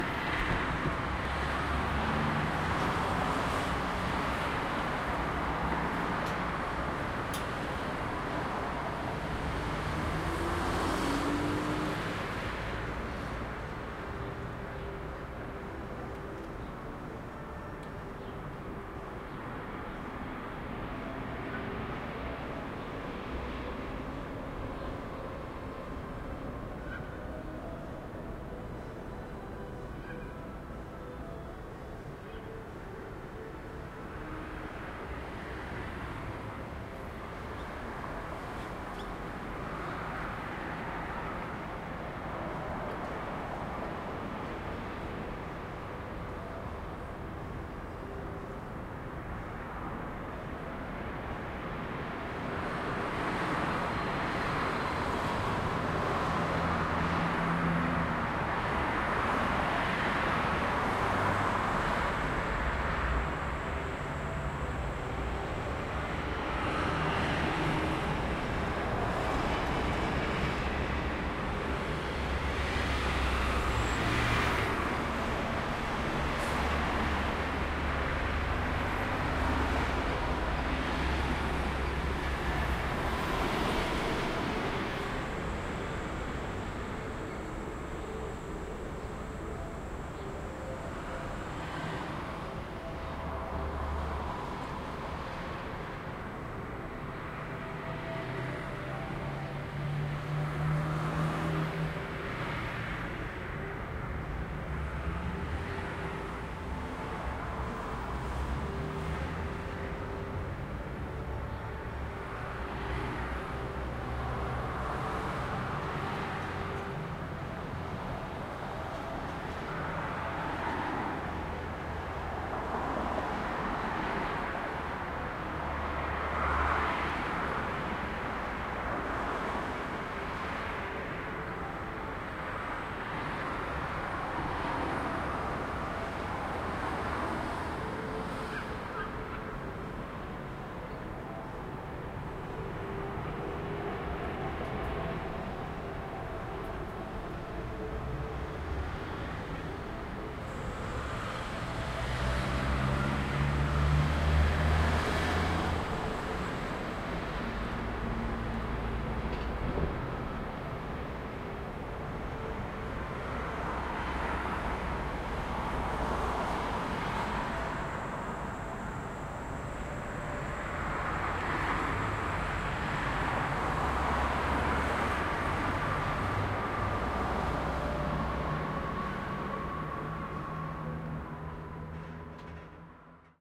morning street noises at Dnipropetrovs'k
city, street-noise, morning, street, traffic